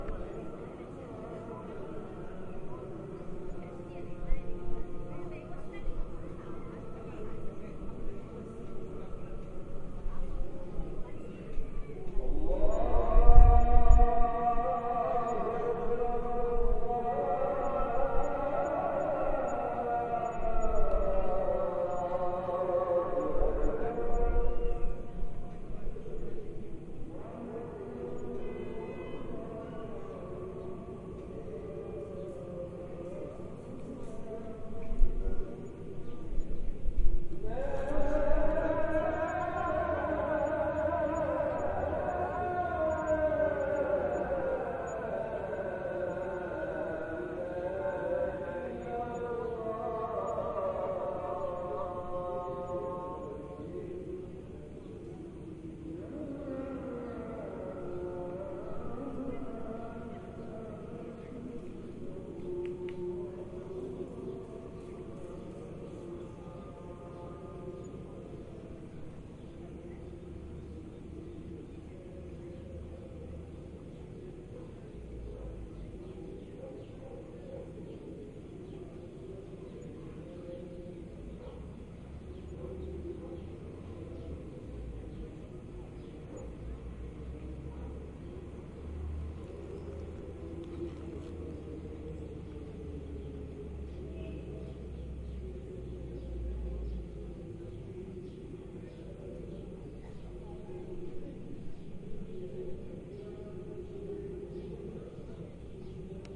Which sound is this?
Call to Prayer Blue Mosque Istanbul
Field recording recorded outside The Sultan Ahmed Mosque
Istanbul, Muslim